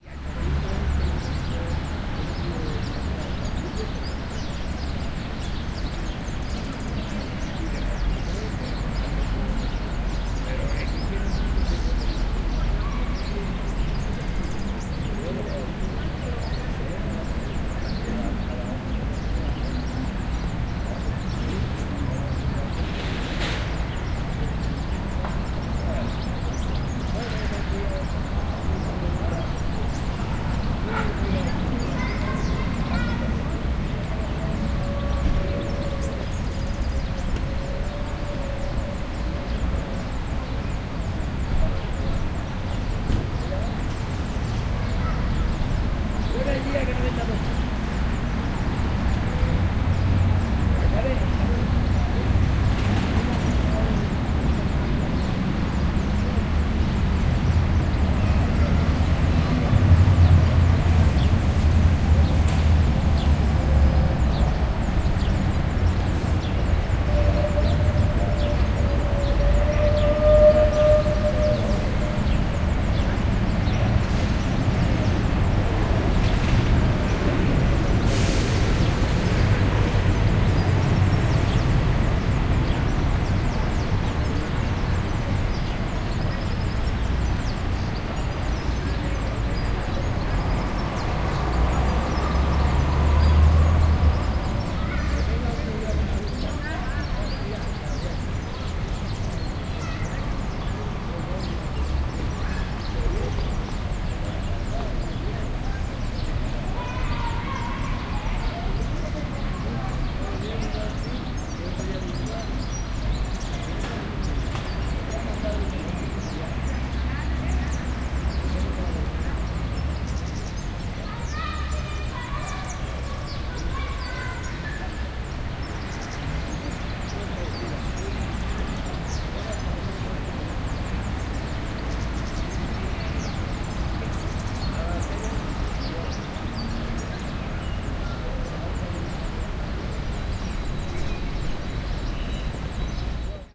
Birds, people talking Spanish, bar tables, car, traffic, children. Bus.
20120324